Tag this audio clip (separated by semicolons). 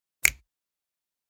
bone; bones; break; clean; click; crack; crunch; finger; fingers; fingersnap; hand; hands; natural; percussion; pop; snap; snapping; snaps; whip